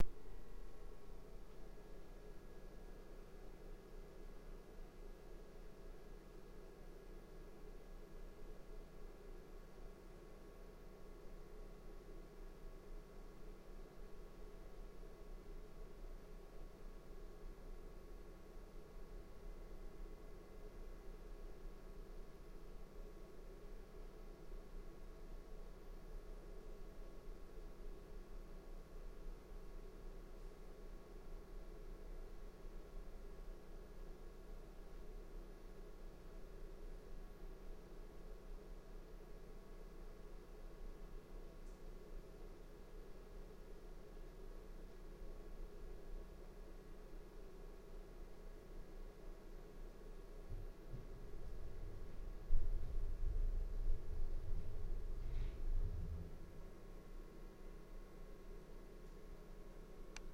room tone 42
Recorded in a room next to a boiler room in the basement of an office in Tribeca
indoors, loud